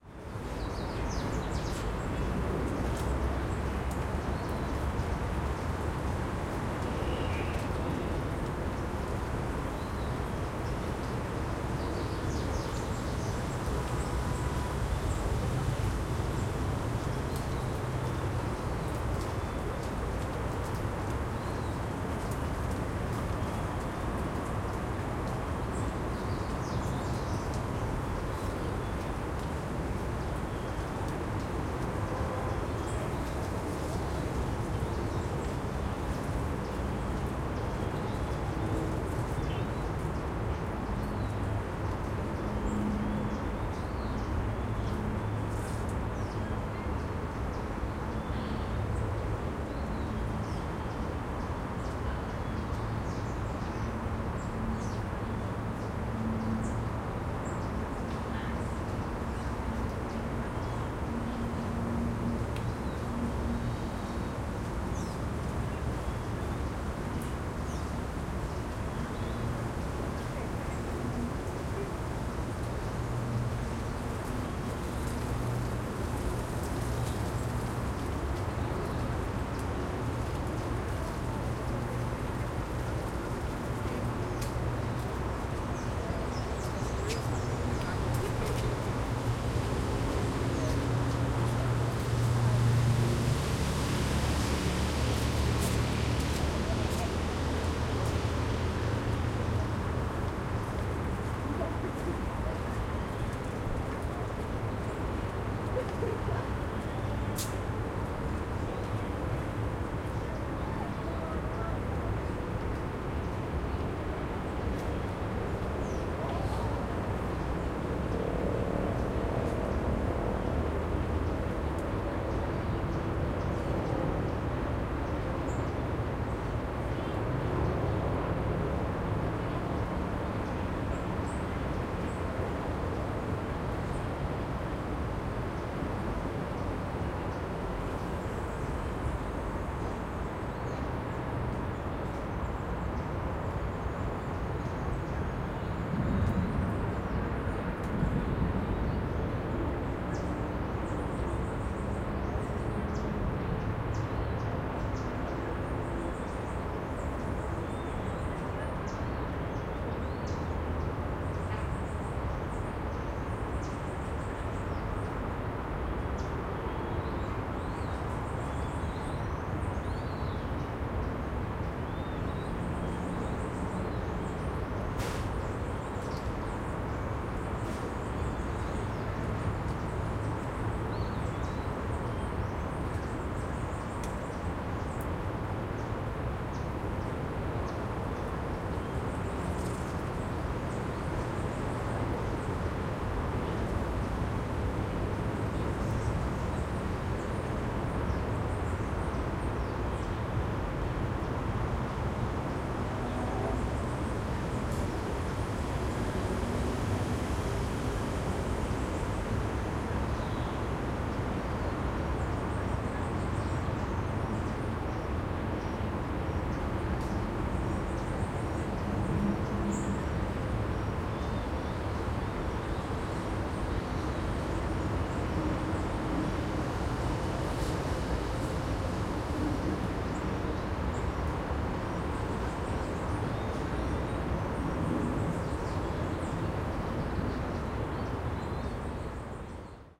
Medellin City Atmosphere Stereo
Ambience o atmosphere from Medellin with cars passing by and the city-noise Stereo. Recorded with Zoom H3-VR.
Atmosphere, City-Sound, Urban, Ambience, City-Noise